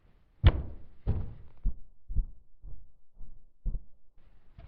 footsteps GOOD 2 A
Mono recording of feet (in boots) walking on plywood. No processing; this sound was designed as source material for another project.